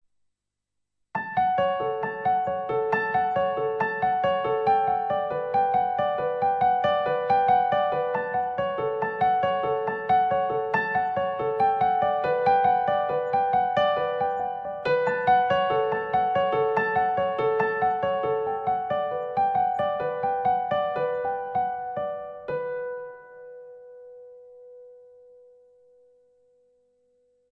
happy piano
A short bit of piano music. Created with a syntheziser and recorded with MagiX studio. can be used for various purposes.
short, piano